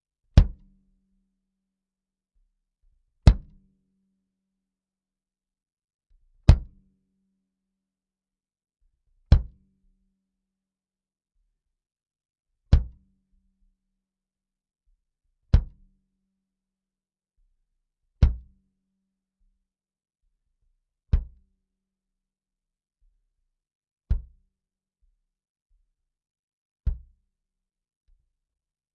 DW Bass Drum recorded with a Shure Beta52 Microphone.